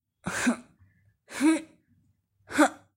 80-Lifting Mannequin
Lifting, Object